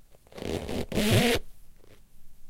zoom H4.
pulling the yoga mat with my hand and letting it slip.
rubbing, rubber, mat, yoga, squeak